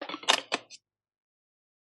delphis COFFEE BOTTLE PUT BACK 2
bottle, coffee, fx, machine